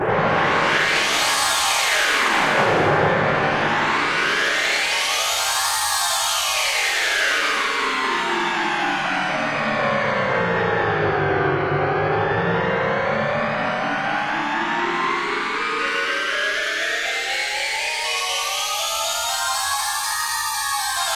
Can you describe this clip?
Notes played really fast on keyboard.
factory, industrial, machine, machinery, mechanical, noise